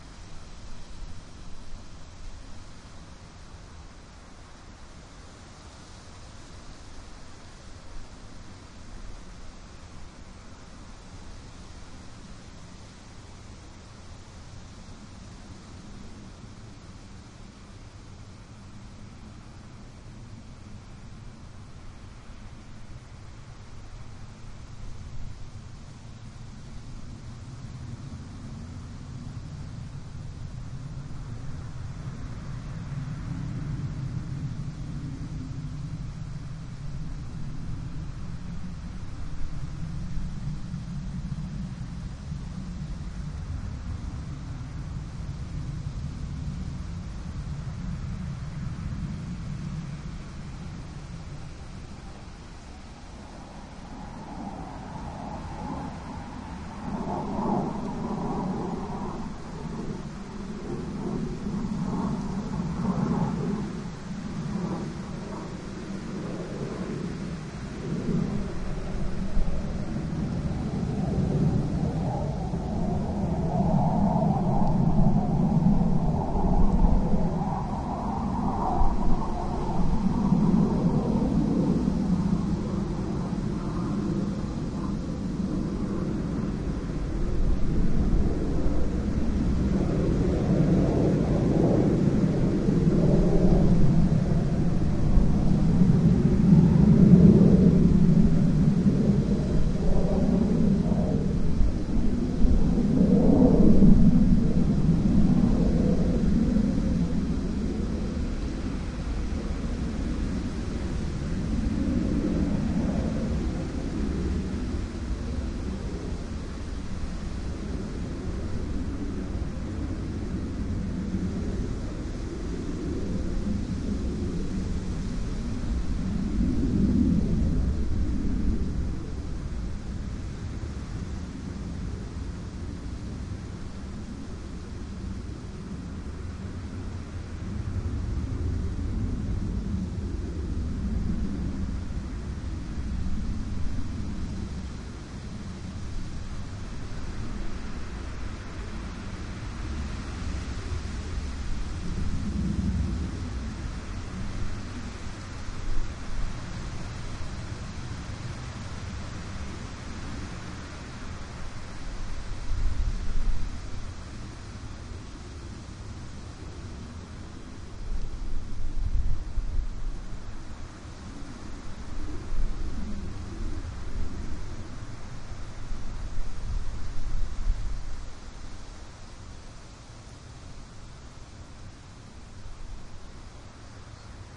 Fighter jet at night 01
File 1 / 10. F-16 Fighting Falcon flying low after midnight near Varde, in Denmark. F-16 pilots are practising dogfight and night flying all night through. This was cut out of a two hour long recording, there's a lot of wind at some points, but one definitely can hear the jets clearly. This lets you hear how it sounds when an F-16 passes by almost exactly over you. There's a good doppler effect and a nice depth to this recording.
Recorded with a TSM PR1 portable digital recorder, with external stereo microphones. Edited in Audacity 1.3.5-beta on ubuntu 8.04.2 linux.
midnight, windy, denmark, aeroplane, military, fighter, jet, aero, fighter-jet, flying